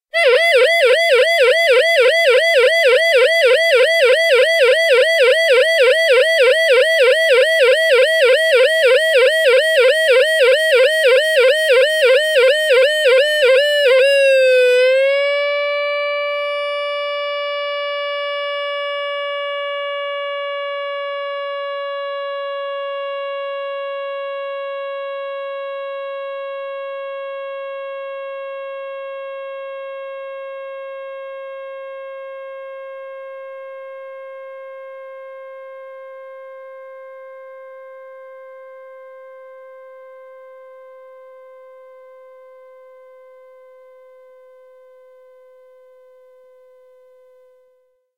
Siren Runout
A mono recording of a siren that runs out of power. Recorded from a broken dynamo charging torch/radio/siren/strobe thing found in a skip. Yes, I am a skiprat and proud of it.
alarm, mono, siren